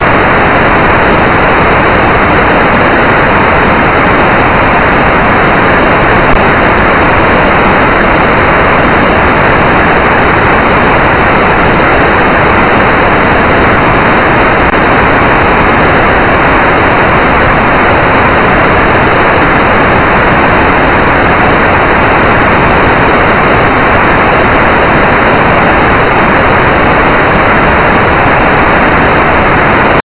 08 LFNoise0 3200Hz
This kind of generates random values at a certain frequency. In this example, the frequency is 3200Hz.The algorithm for this noise was created two years ago by myself in C++, as an imitation of noise generators in SuperCollider 2.
frequency, low, noise, step